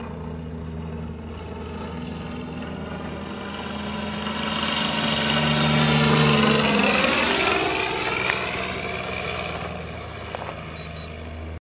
rc plane fly-by 2
radio control gas engine noises
airplane, engine-noise, rc-airplane